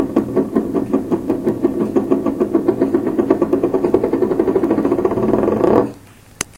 puodel sukas letai oo
tea mug spinning
mug, spinning